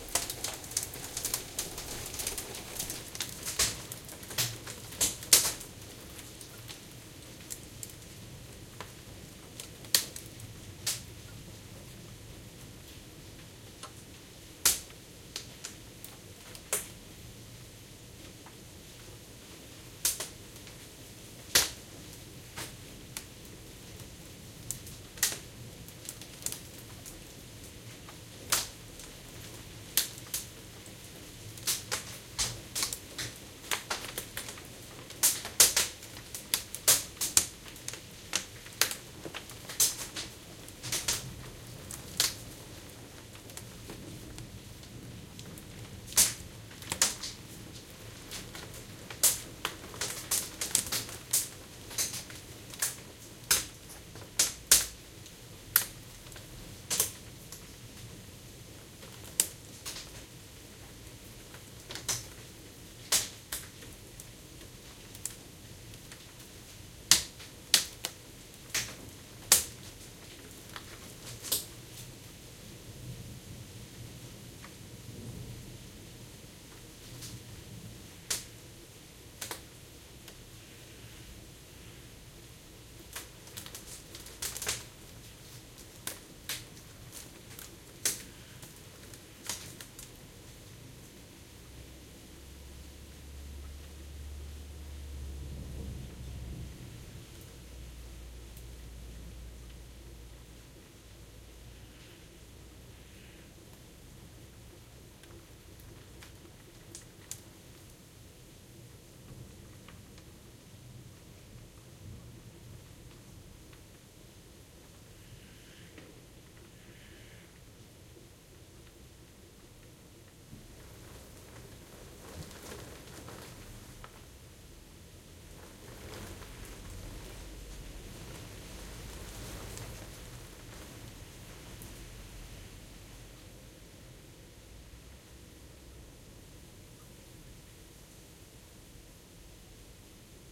Stereo Rain + Thunder + Hail Storm (Indoor Recording)
The rest of the thunderstorm recorded from inside my apartment next to the window. Yo can hear the hail impacts on the glass.
ambiance, ambience, ambient, binaural, cars, france, hail, lightning, rain, rainstorm, rumble, soundscape, storm, street, thunder, thunder-storm, thunderstorm, town, weather, window